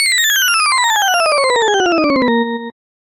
07-Galaga Dive 2
This sound, created with OpenMPT 1.25.04.00, is what I will use in a custom game creation. The game is "Galaga Arrangement Resurrection."
arcade, games, video-games